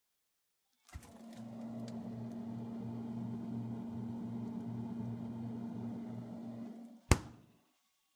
freezer opening and closing
close, door, freezer, freezer-door, open